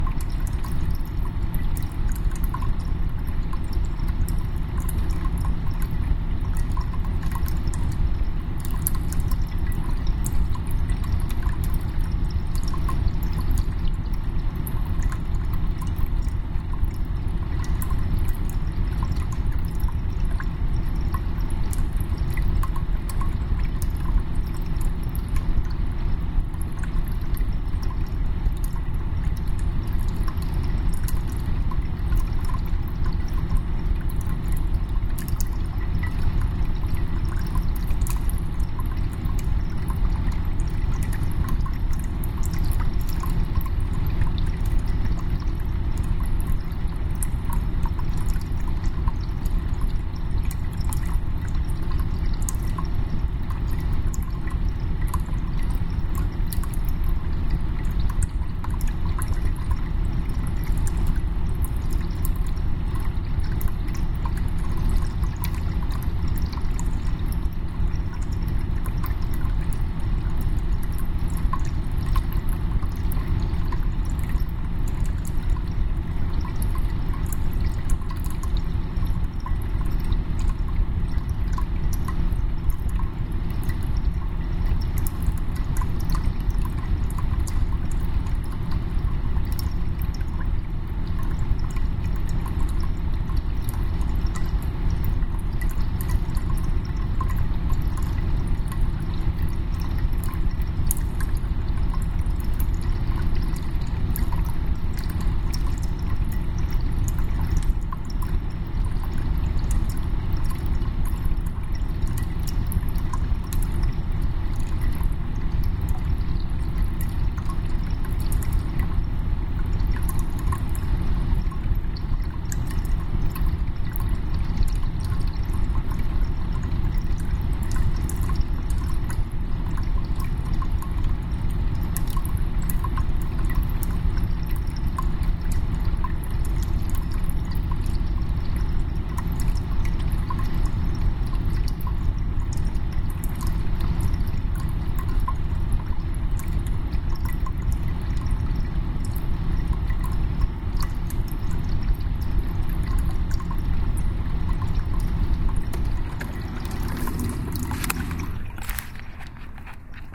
Water-Fan
Field recording of cat water fountain and a fan.
water, fan, field-recording, dripping